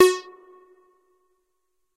moog minitaur lead roland space echo